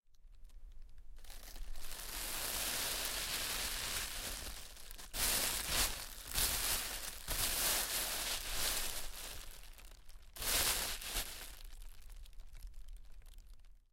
cellophane bag hit impact
cellophane, impact, bag, hit